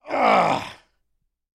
Manly voice grunt.
vocalization, working, male-voice, heavy, lifting, grunt, construction, manly-sound